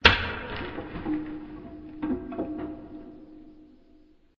Chopping Wood 02 - reverb
Chopping Wood
Recorded with digital recorder and processed with Audacity
logs, collision, falling, hatchet, crash, axe, chopping, bang, log, wood, chop, firewood, collide, percussive